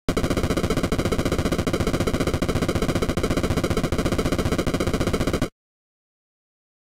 Text Scroll 1# 3 220
A strong drum sound in the noise channel of Famitracker repeated to show dialog scrolling